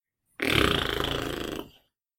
tongue funny sound
mouth, human, flicker, tongue